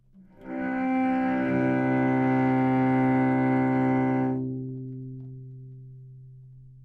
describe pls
Cello - C2 - other
Part of the Good-sounds dataset of monophonic instrumental sounds.
instrument::cello
note::C
octave::2
midi note::24
good-sounds-id::281
dynamic_level::p
Recorded for experimental purposes
single-note, good-sounds, cello, multisample, neumann-U87, C3